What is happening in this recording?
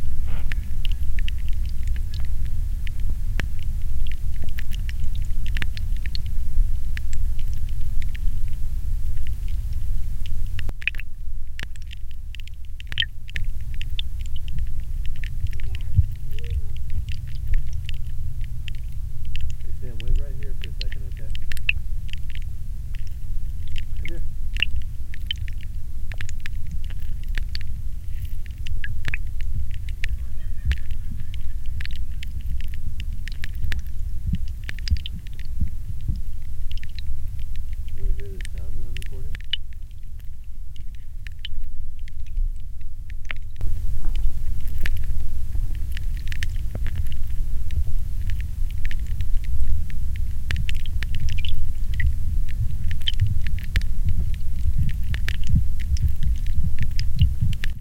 Underwater recording in a pond during a light rain at Mayfield Park in Austin, TX